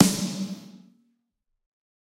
Snare Of God Wet 028
drum, realistic